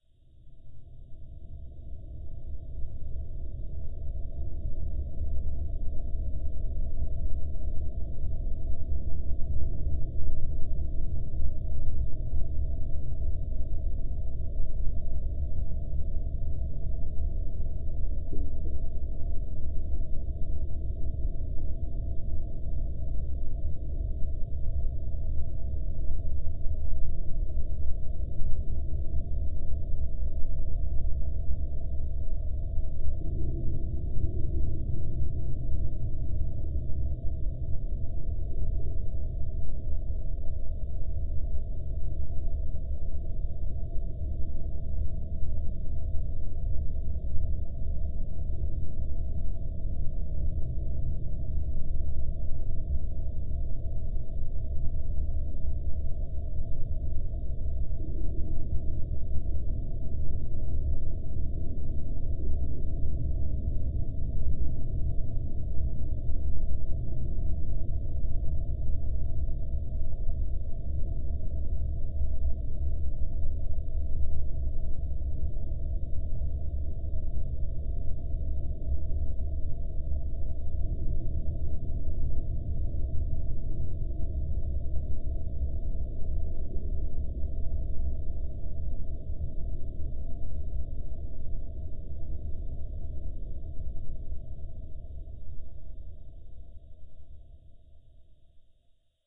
For best experience, make sure you:
* Don't look at the sound waves (the sound display) at all!
* Is in a pitch-black, closed room.
* For ultra feeling, turn up the volume to 100% and set the bass to maximum if you have good speakers! Otherwise put on headphones with volume 100% (which should be high but normal gaming volume).
* Immerse yourself.
Space Atmosphere 03 Remastered
This sound can for example be used in action role-playing open world games, for example if the player is wandering in a wasteland at night - you name it!
If you enjoyed the sound, please STAR, COMMENT, SPREAD THE WORD!🗣 It really helps!
More content Otw!